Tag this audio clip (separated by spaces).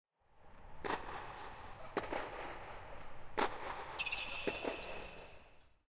bounce bouncing dome feet playing racket squeaking